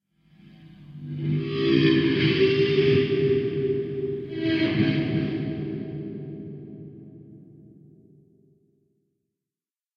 Bounced feedback
This was recorded using two microphones (a Shure SM-58 and an AKG) positioned directly in front of a VOX AC30 amplifier, each mic catching each speaker (with obvious bleed!). The guitar used was a Gibson SG. This feedback sample has already been edited and bounced.
feedback, guitar, swirling